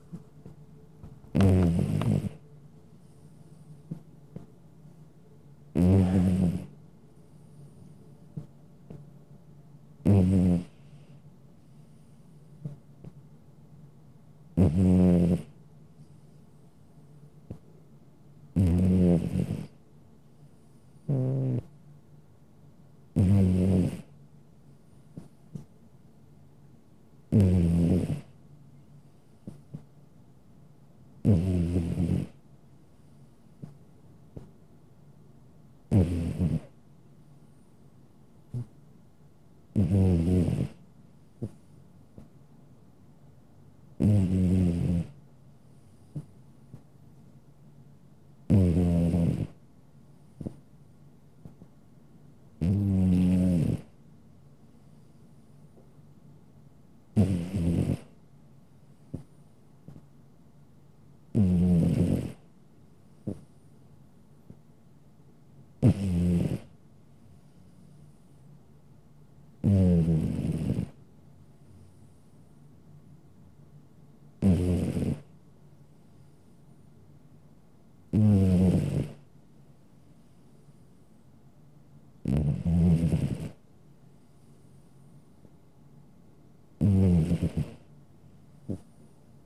Mono recording of my elderly cat snoring.